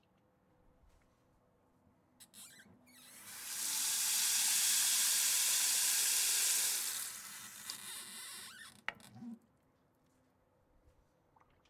sinking turning on and off
Turning on a kitchen sink and turning it off
turn-on, water, sink-running